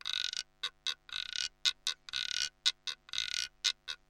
Guirro played by me for a song in the studio.
rhythm, percussion, guirro, latin